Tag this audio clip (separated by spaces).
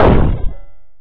metal industrial drum synthetic percussion